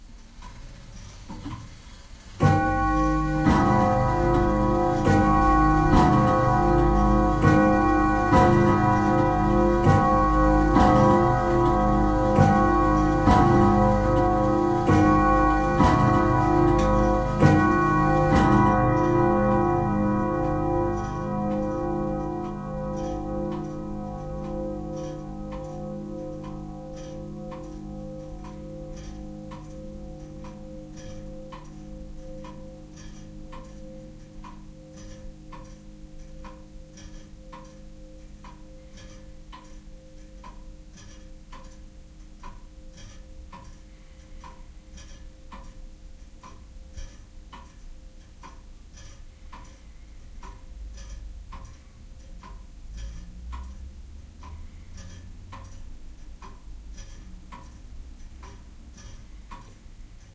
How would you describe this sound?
grandfather's clock
Sound of grandfather clock striking. The sound dies out and then the clock keeps on ticking for a while.
bell, clock, grandfather, grandfather-clock, grandfathers-clock, hour, tac, tic, tick, ticking, time